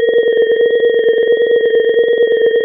Laser Ambient
Laser amibient. Generated with "Generator Tones" with low frequency.
ambient, laser, loop, mechanical, tech